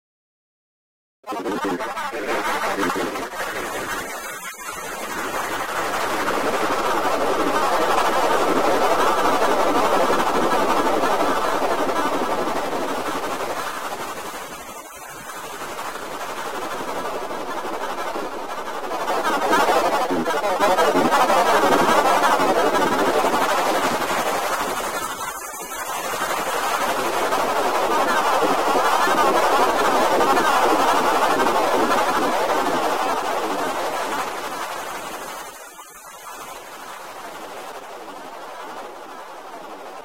SYnth NoisesAI
A small collection of SYnthetic sounds of varying frequencies. Created with amSynth and several Ladspa, LV2 filters.
Hope you enjoy the sounds. I've tried to reduce the file sizes due to the low bandwidth of the server. I hope the quality doesn't diminish too much. Didn't seem too!
Anyhoo... Enjoy!